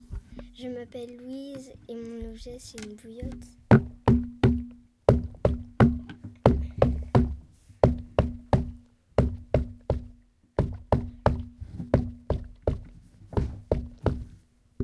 mysounds-Louise-bouillotte
hot-water bag
mysounds, france